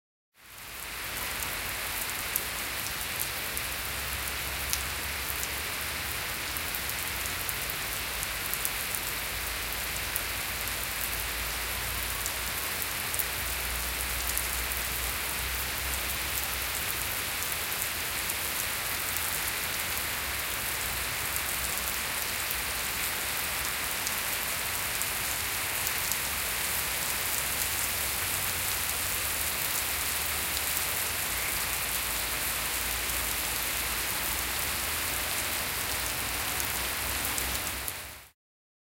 bnral lmnln rain outsde
Binaural recording of rain recorded outside in the city of Utrecht.
rain, atmosphere, binaural, noise, environmental-sounds-research